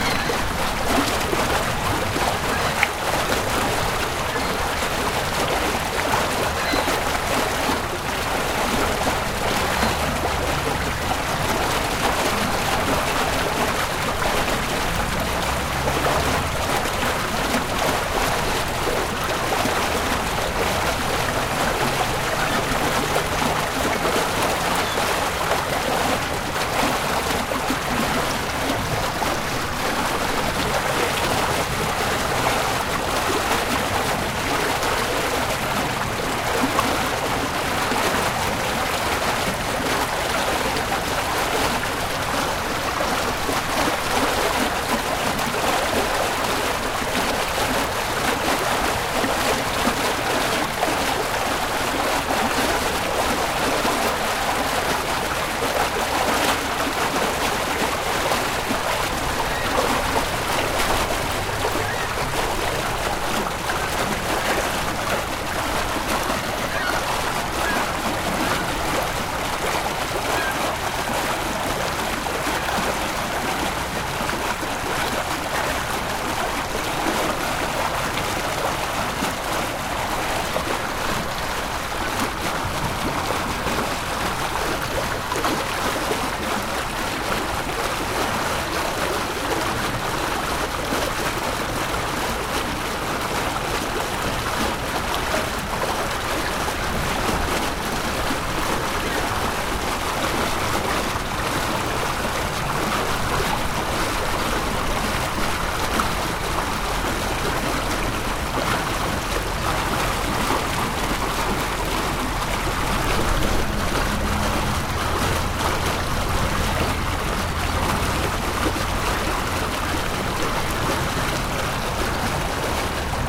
Water Gushing out of Freighter
24, a, bit, D50, Freighter, Gushing, pattern, PCM, recorded, Sony, Water, xy